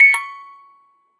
Metal cranktoy chopped for use in a sampler or something
cracktoy, musicbox, metal, toy, crank-toy, childs-toy